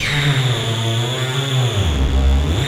A cheap Behringer Mixer and a cheap hardware effects to create some Feedbacks.
Recorded them through an audio interface and manipulated in Ableton Live with a Valhalla Vintage Verb.
Then sound design to have short ones.
Electronics, Feedback, Mixer, Noise